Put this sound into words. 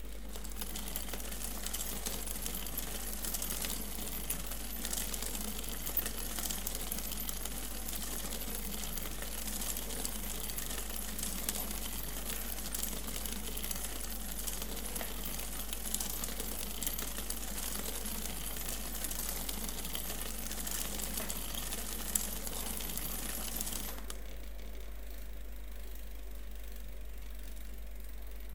bicycle front derailleur crankset
old bicycle "merida" recorded at home, arm-pedaling
pedaling, bicycle, chain, gears, whirr, click, derailleur, crankset, bike